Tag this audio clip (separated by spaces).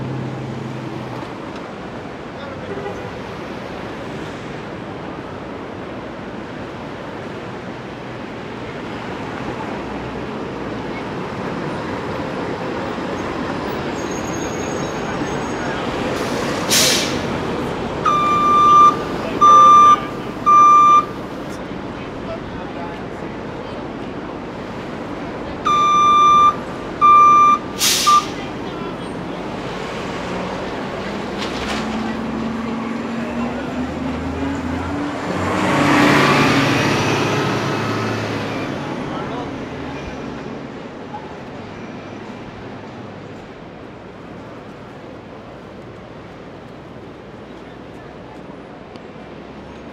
bus city NYC